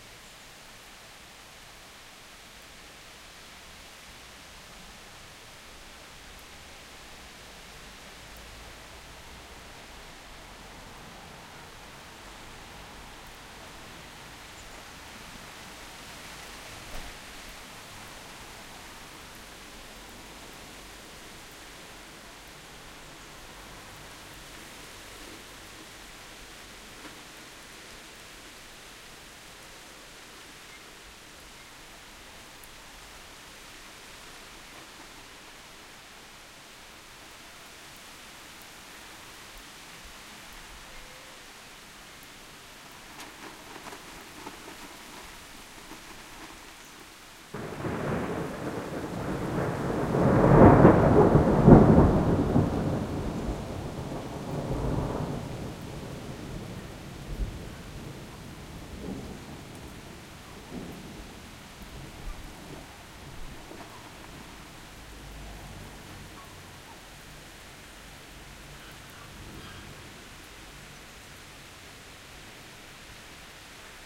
Rain and Thunder Crack at 50s
There was a surprise thunder storm today so I quickly grabbed my Zoom H5 and got to the window. Managed to record one good thunder crack so I was happy.
You hear rain for the most part but at 50 seconds in there is a large thunder crack.
Hope this is of use to someone :)